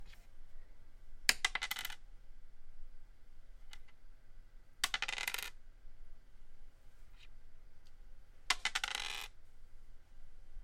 4-Sided Die Rolled on Wood Table
A plastic 4-sided die rolled on a wooden table.
wood, die